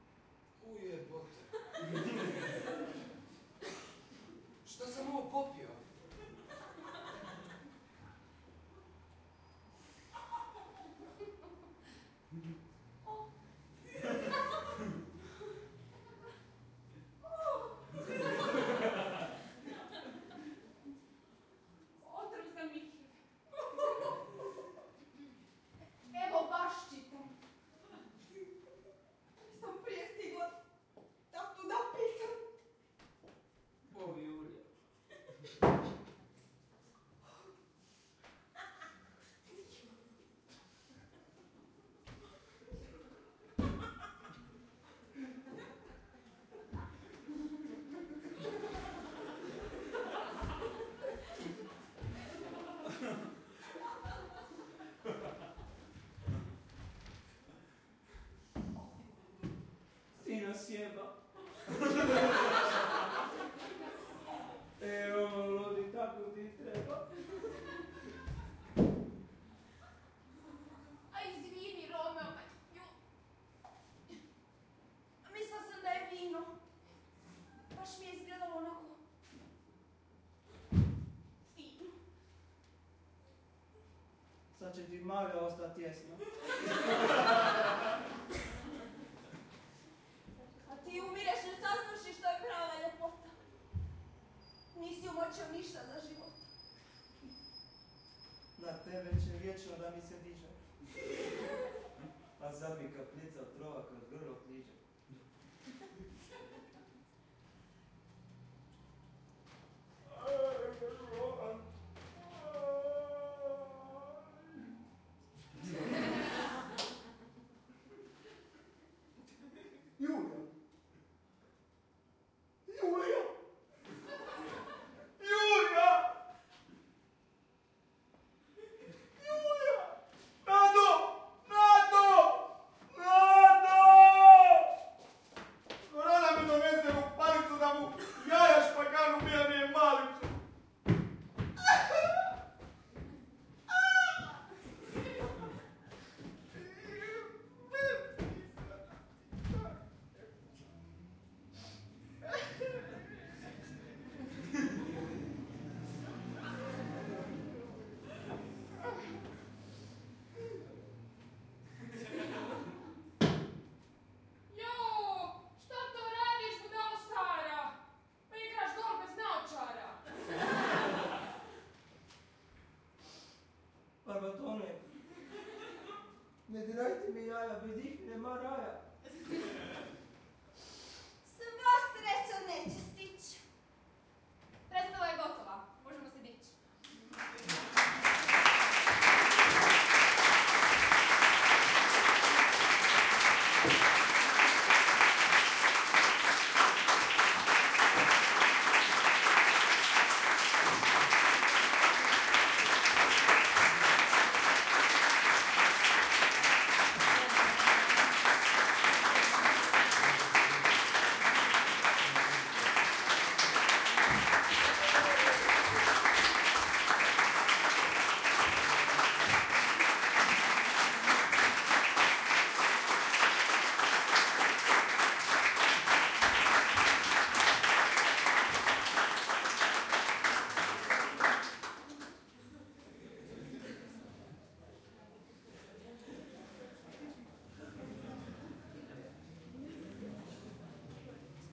Applause during theatre play in Istrian club in Rijeka.